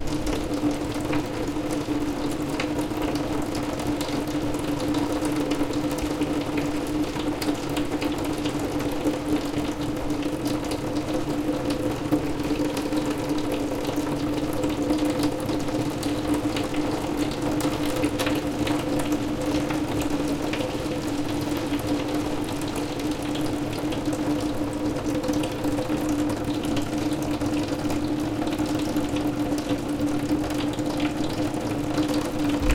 fs-RainOnGlass-4
Continuous raindrops on glass window. Recorded on Zoom H4. Light compression.
glass, field-recording, weather, rain, sample, natural